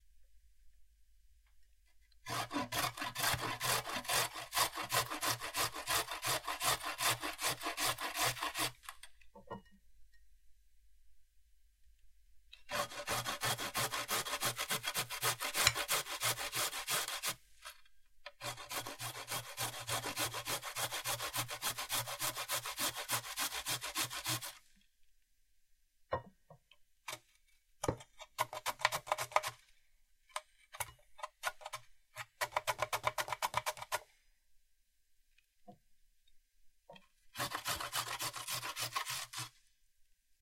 Grating a carrot on the three different sides of a grater.
appliance, grating, OWI, carrot, cooking, grater, vegetables, food, kitchen